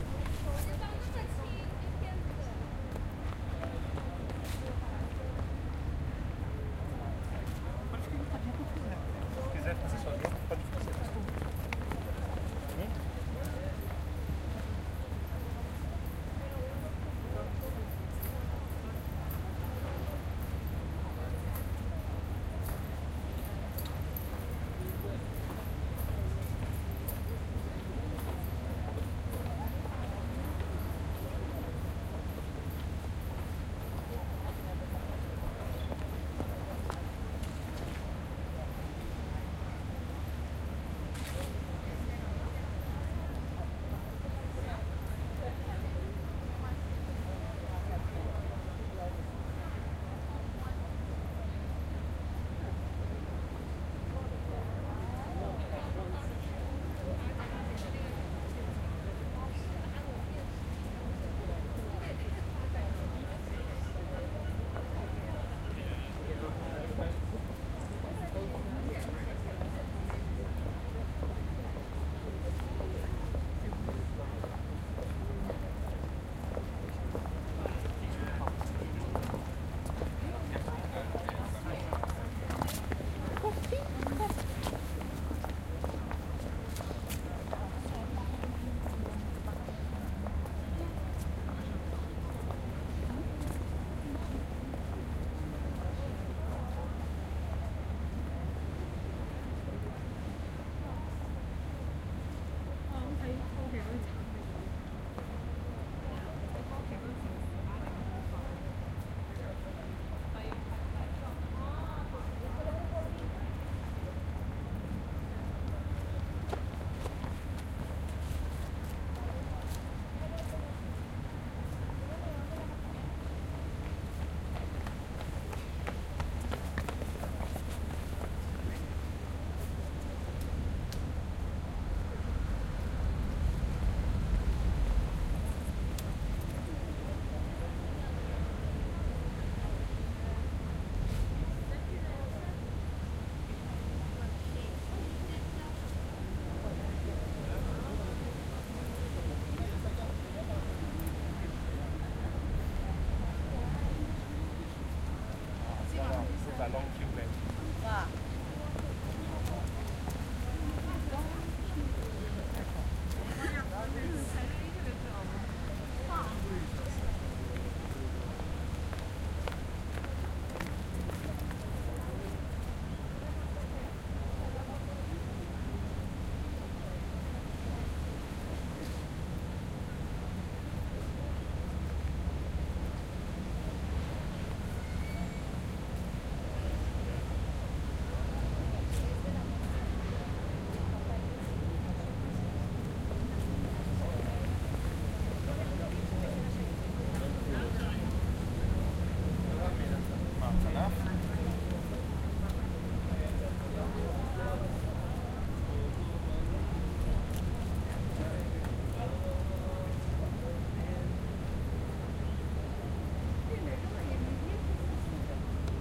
Thames Nr Tower3
Sounds from the river on the embankment of the River Thames at the south side of the Tower of London.
ambiance, ambience, ambient, atmosphere, background-sound, field-recording, general-noise, london, people, soundscape, tourists